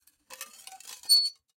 Small glass plates being scraped against each other. Very high pitched squeak from scraping. Close miked with Rode NT-5s in X-Y configuration. Trimmed, DC removed, and normalized to -6 dB.